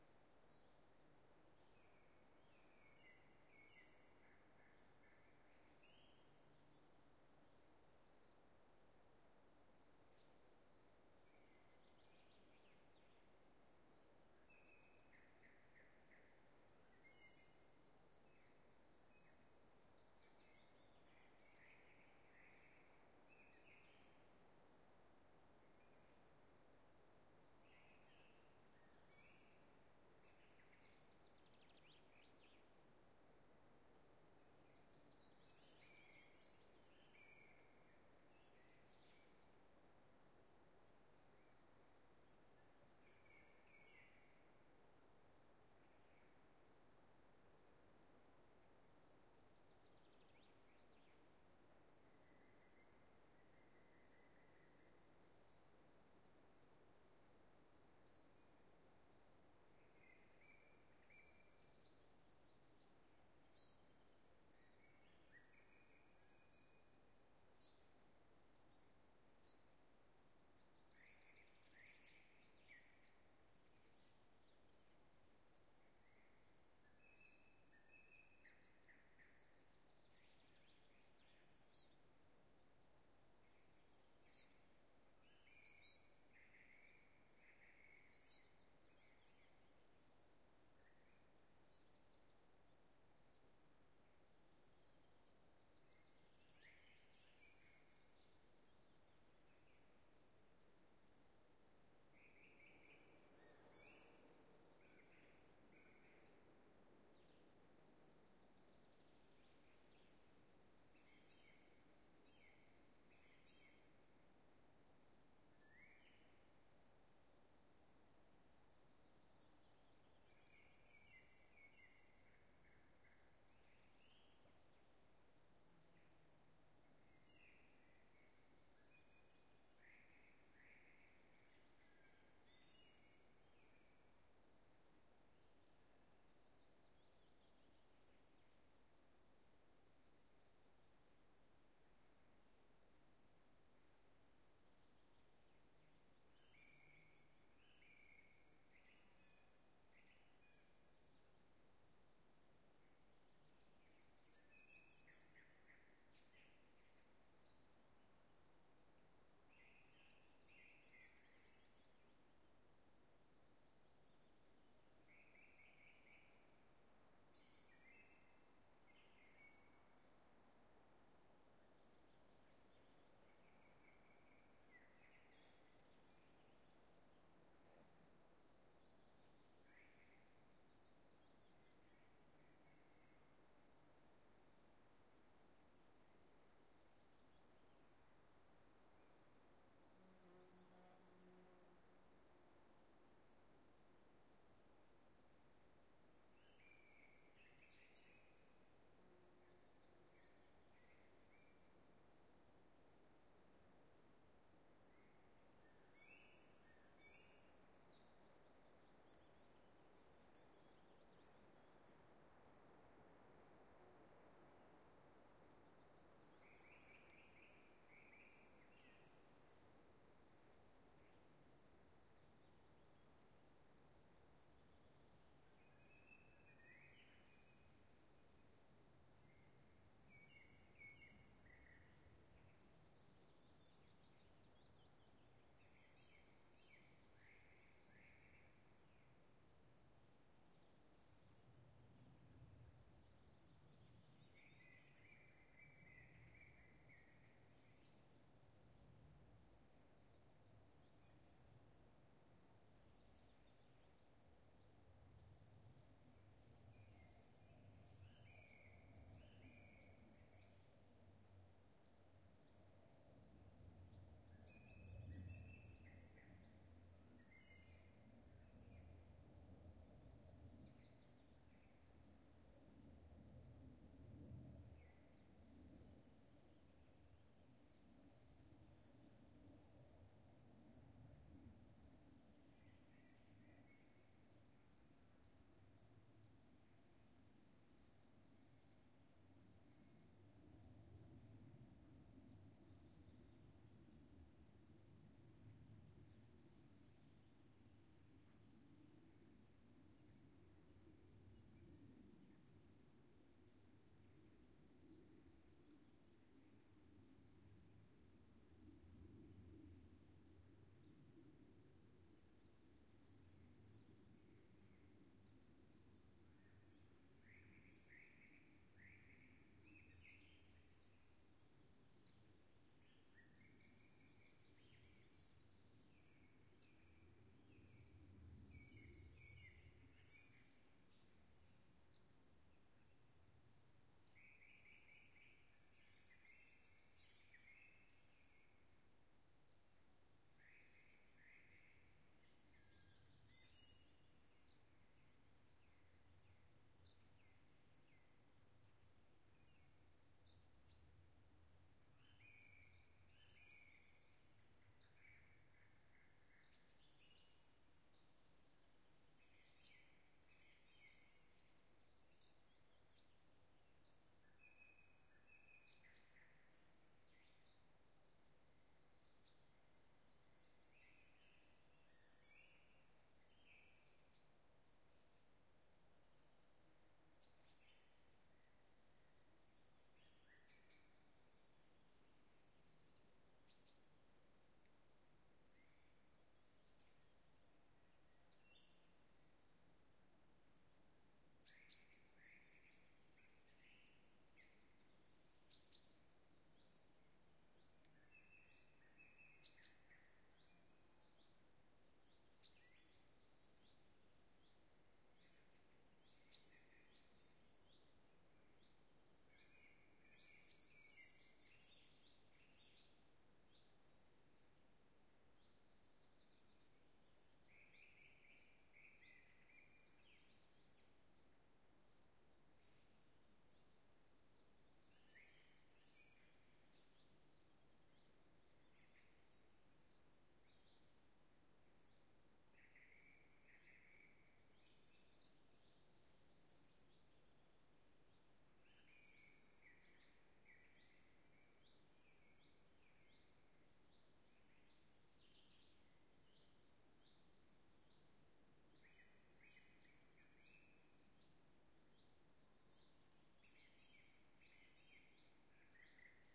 Nord Odal Nyhus 04 juni 2011 open forest birds insects wind through large pines
Pine forest in Nord Odal small place north of Oslo, Norway.
insects, birds, forest, filed-recording